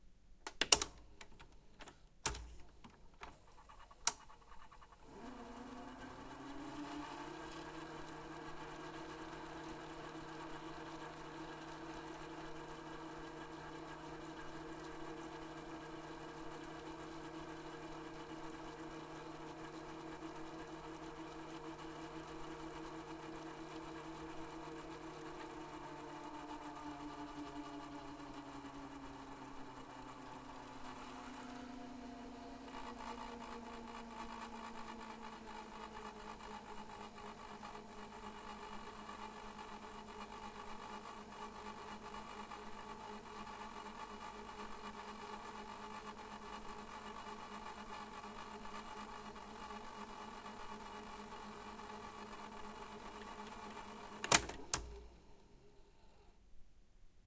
VHS Cassette Rewind
Rewinding a VHS cassette in a Philips VR6585 VCR. Recorded with a Zoom H5 and a XYH-5 stereo mic.
vcr, mechanical, machine, vhs, rewind, cassette, player, recorder, tape